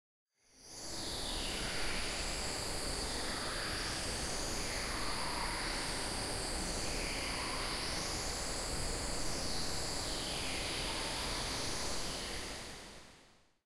Bird Park 2
soundscape birds nature forest ambience
Forest, synthesized